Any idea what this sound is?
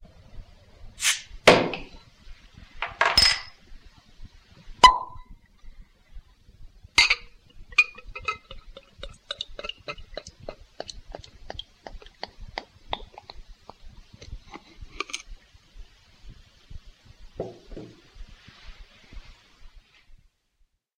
weissbier-bottle opening
Weissbier - open a bottle and fill the glass
beer, beer-bottle, cap, fill, filling, glass, hiss, open, opener, plop, weissbier